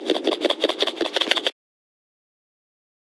An umbrella sound opening and closing rapidly then slowed down and sped up again.
Manipulated, MTC500-M002-s14, Umbrella